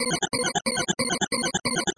Loop created with softsynth. Tempo if known is indicated by file and or tags.
loop, space, synth